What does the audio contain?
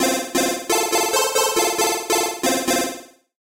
An 8-bit winning jingle sound to be used in old school games. Useful for when finishing levels, big power ups and completing achievements.